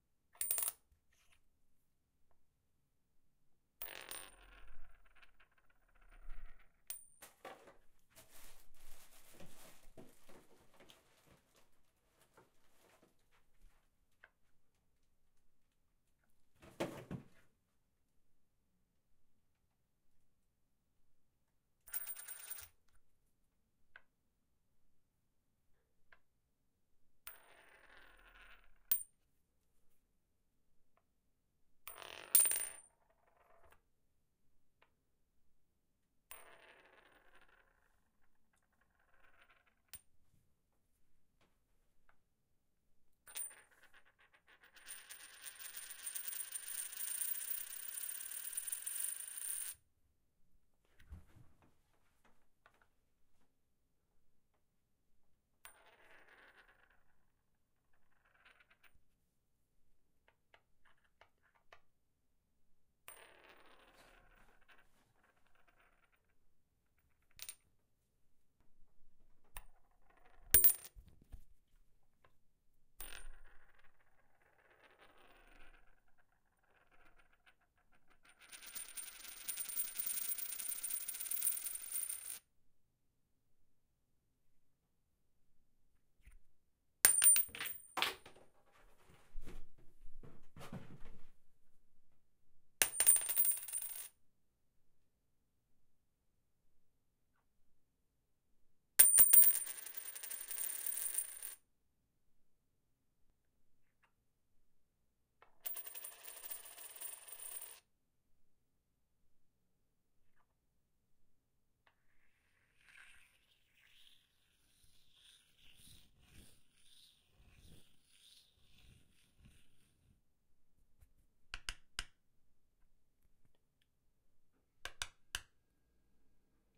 Coin Sounds
Recorded myself dropping, spinning, flicking, tapping, etc a quarter on the counter in my bathroom. It kept falling off the edge (the first bit of sound is from the quarter falling in the trash next to the counter lol). Recorded using my Zoom H4n with it's built-in mics, XY array at 90 degrees.
cash, coin, quarter